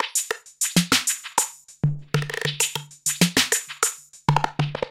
DM 98 808warped top
Vintage drum machine patterns